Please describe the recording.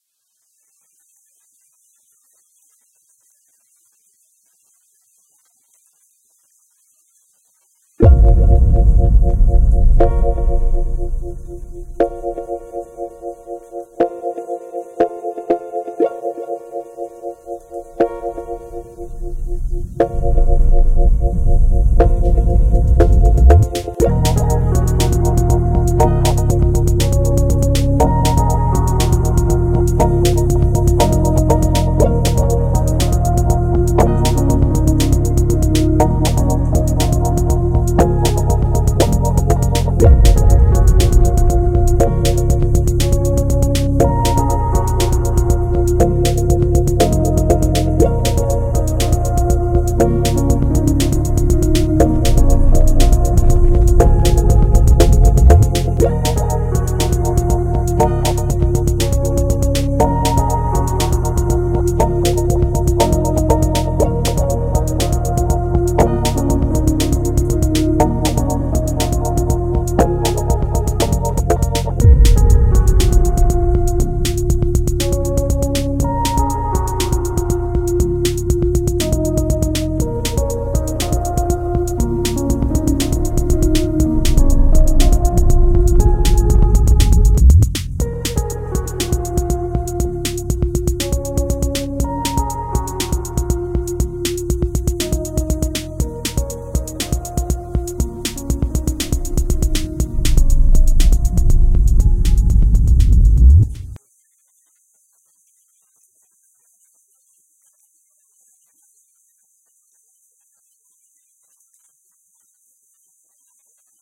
Rainy Day Sidewalking
An approximately 2 minute in length beat for backgrounds. Listen with headphones or nice speakers otherwise ambiance and bass line do not pick up. Produced with Cakewalk Sonar. Contains samples of "Harp" by Pryght One, "Rain" by Marec, and "Cinematic Boom Norm" by Herbert Boland.
120-bpm, ambient, atmospheric, background, electronica, harp, instrumental, rain